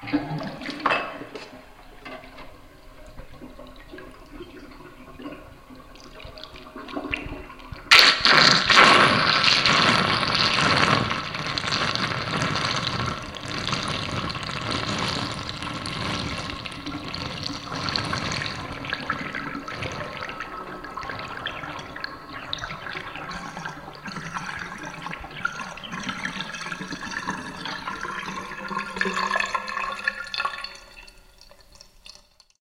Kitchen-Sink-Drain-6
This is a stereo recording of me draining my kitchen sink. I filled my sink about half full (it is a dual, stainless steel sink). It was recorded with my Rockband USB Stereo Microphone. It was edited and perfected in Goldwave v5.55. I pulled the stopper from the sink, and within 5-8 seconds, a vortex forms, and the rest is history! This is gotta be one of my top 10 clearest recordings yet! Enjoy.
drain, gargle, glub, plug, plughole, sink, sqeal, squeally, vortex